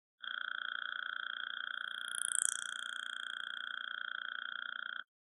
Insect ambience 1
Actually made from a note played on a trombone. I left out panning as these are great sounds to play and experiment acoustic space with.